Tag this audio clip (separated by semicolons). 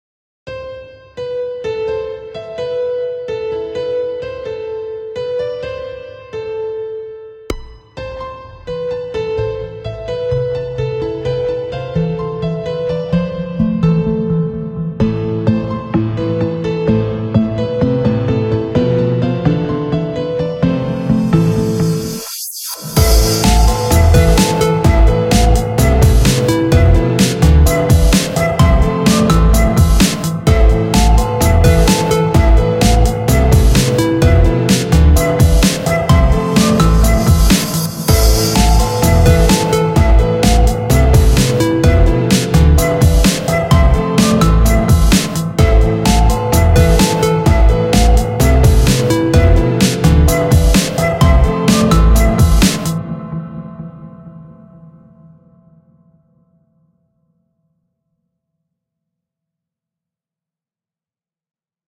Beat; cool; drumloop; piano; Strange; Unusual; Vocal; Weird